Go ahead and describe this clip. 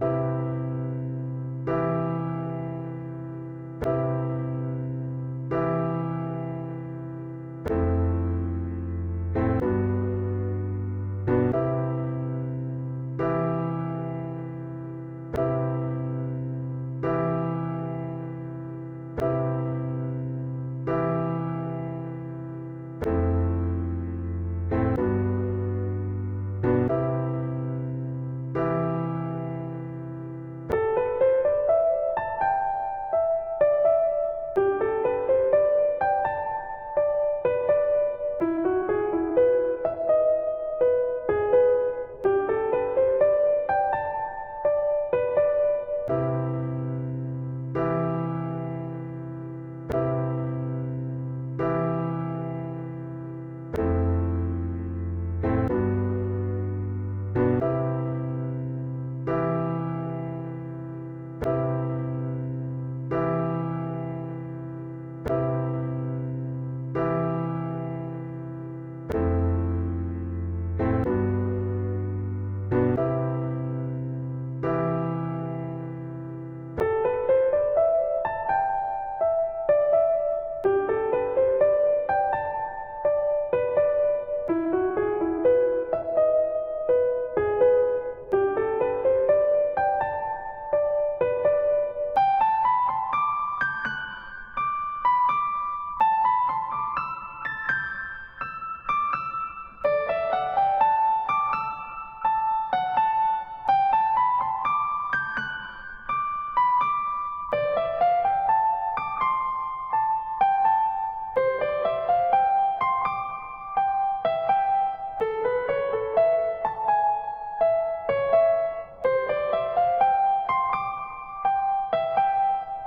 Smooth Stuff04
B, Baroque, Classical, Gospel, Indie, Jazz, Melodic, New-Sound, Polyphonic, R, Rock